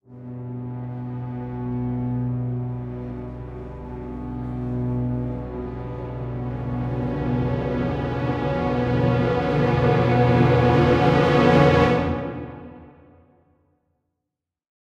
Suspense Orchestra Cluster. Key: Bm, 120 BPM